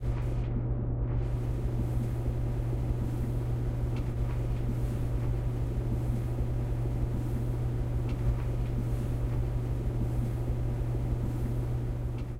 My laundry room.
factory, industrial, laundry, loop, noise